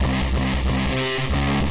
derty jung synth